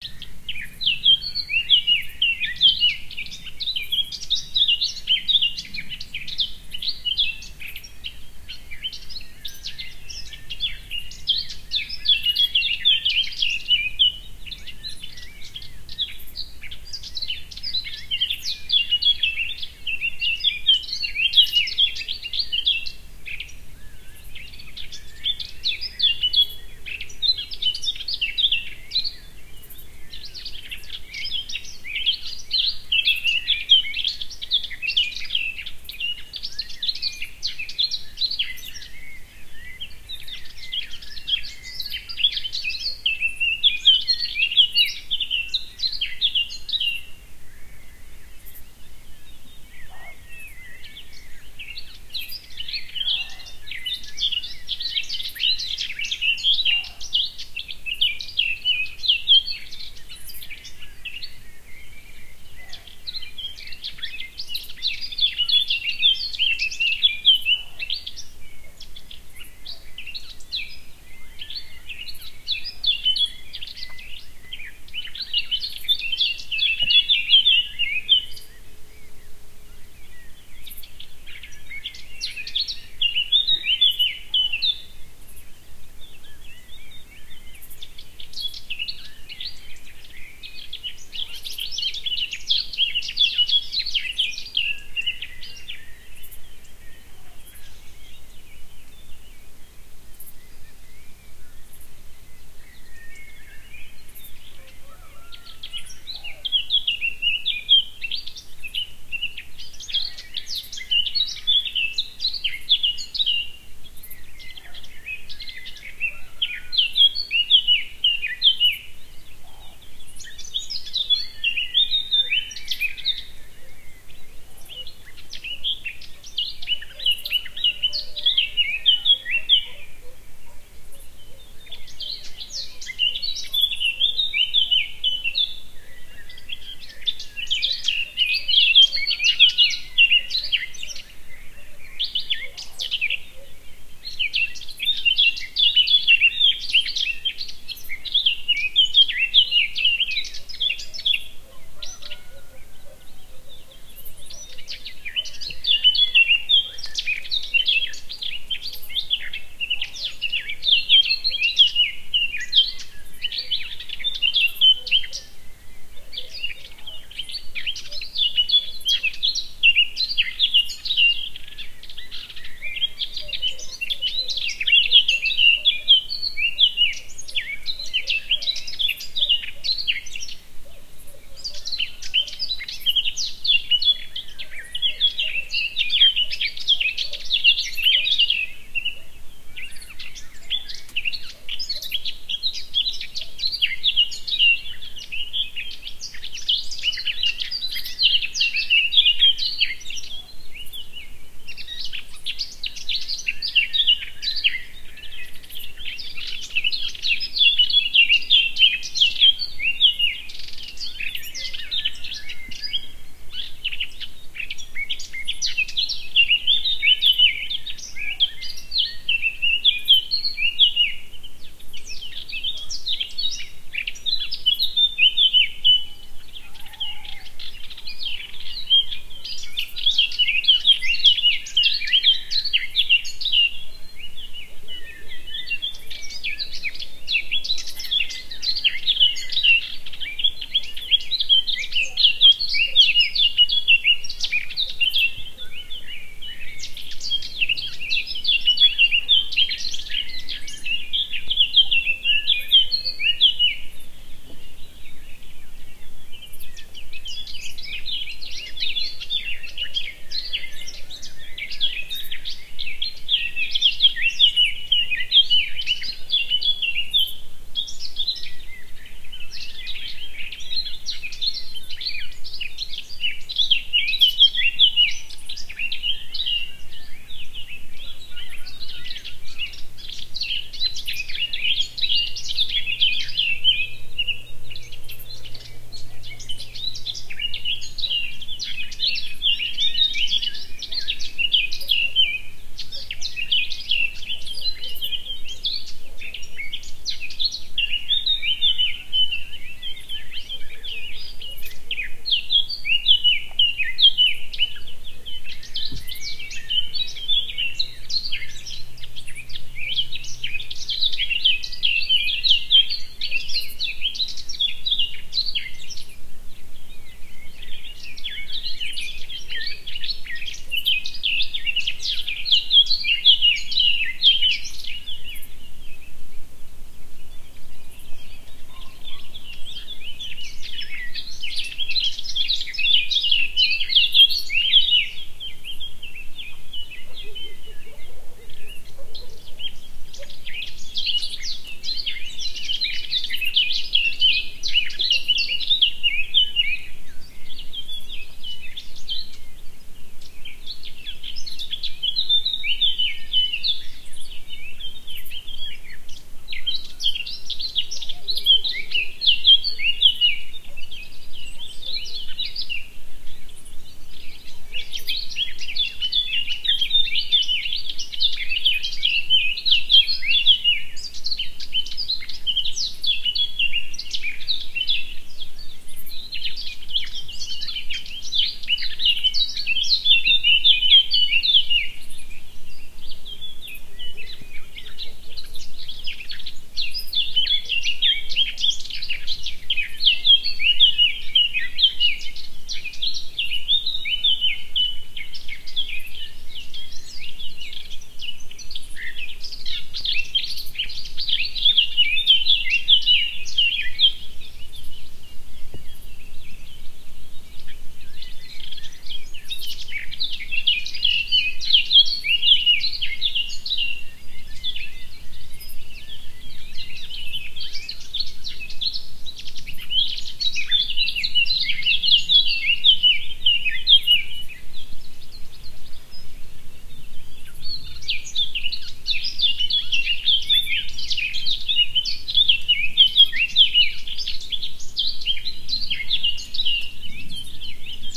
Dawn chorus Tuscany
Recording of birdsong in a Tuscan vineyard at dawn using Edirol R4 recorder and Rode NT4 mic.
birdsong, dawn-chorus, field, italy, recording, tuscany